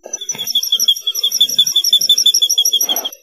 Odd high-pitched squeak from my office chair sounds enough like baby birds chirping to get my cats looking around for them.